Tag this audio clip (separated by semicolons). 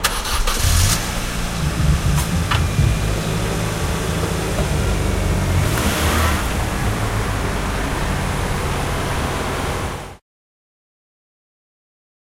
car; driving-away; start